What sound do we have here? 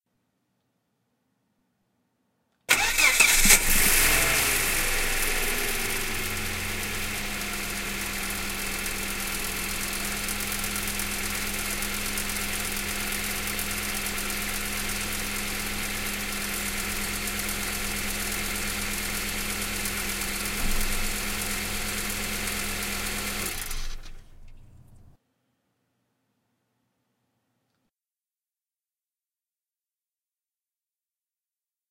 RG Volvo Engine
A short recording of Volvo 2.4 5 cylinder turbocharged engine, from under the hood. Microphone suspended approximately 8" above the turbocharger heat shield from the top.
volvo-2, turbocharger, 4, turbocharged, volvo, engine